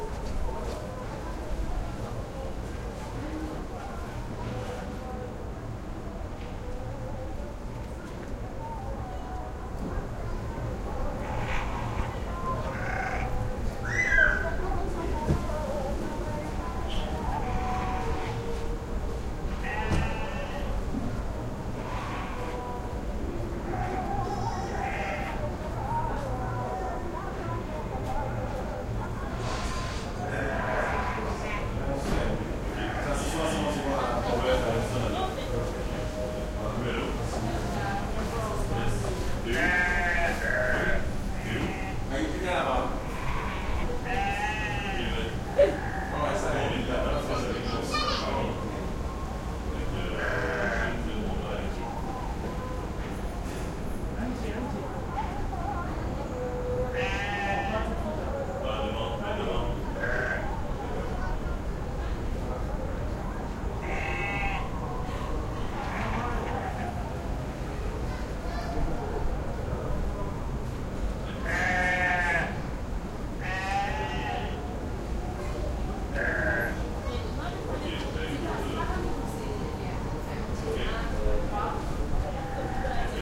skyline rooftop with traffic, sheep bahhing, distant prayer from mosque +men voices int enter room and talk behind mic Dakar, Senegal, Africa
distant, traffic